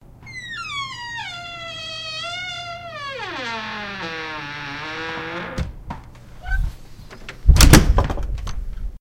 door closing squeak-Door slamming (3)
The sound of a squeaking door slamming shut.
slamming, sound, squeaking, door